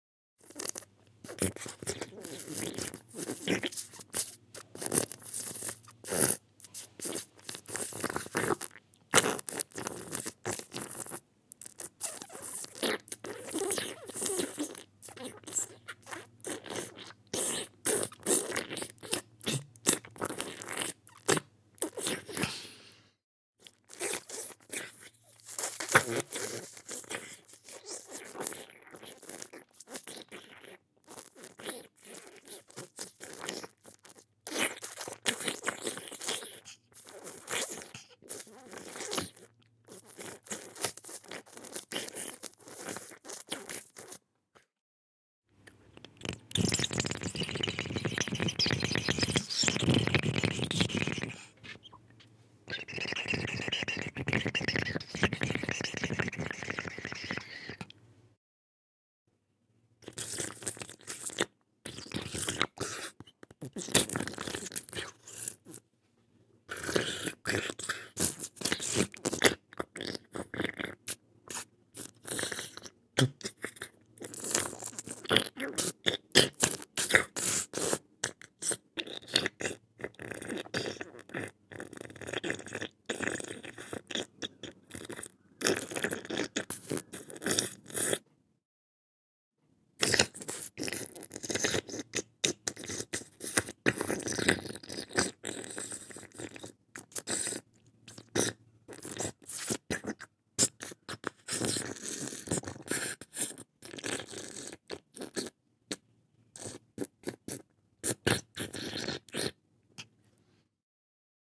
The sound take is mono despite the stereo file.
I wanted it to sound cartoonish